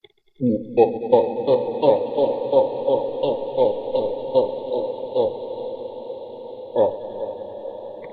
My best evil laugh yet!! like the Count!
evil, laugh